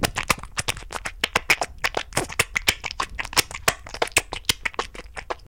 slime noise 6 1
Slime noises done by J. Tapia E. Cortes
GARCIA, Mus-152, SAC, goo, live-recording, putty, slime